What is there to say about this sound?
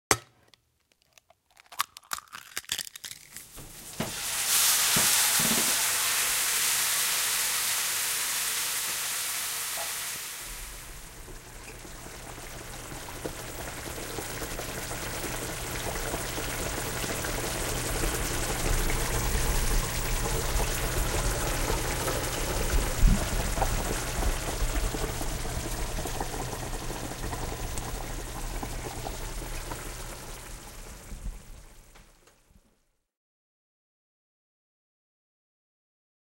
Egg drop soup being prepared. Egg is cracked, followed by cooking and sizzling sound.
egg-drop, cooking, egg